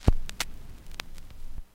The sound of a stylus hitting the surface of a record, and then fitting into the groove.